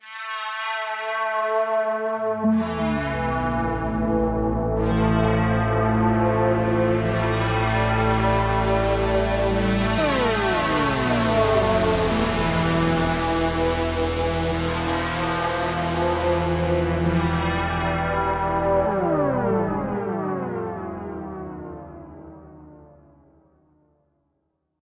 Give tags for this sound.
effects; electronic; synthesizer

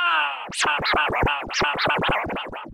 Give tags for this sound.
scratch; turntables

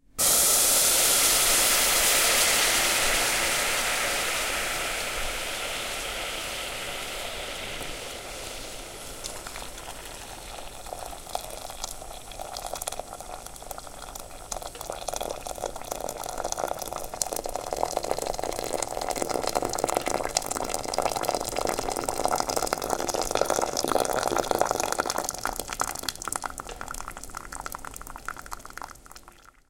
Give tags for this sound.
water-sounds; kitchen-sounds; saucepan; Boiling

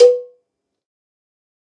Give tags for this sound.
cowbell; drum; god; kit; more; pack; real

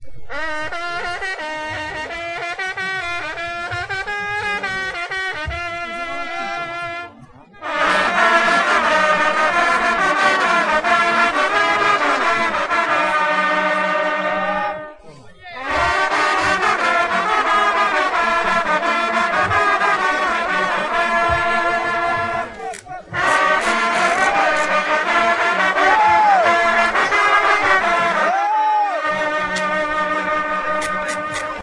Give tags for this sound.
horn; hunting; traditions

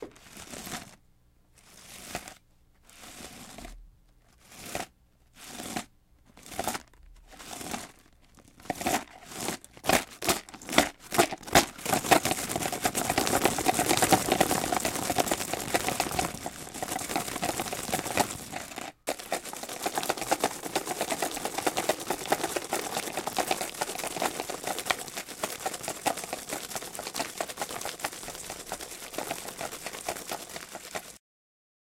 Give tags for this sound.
Audio-Techinica; media; Rock; studio; interactive; Cali; DMI; Shake

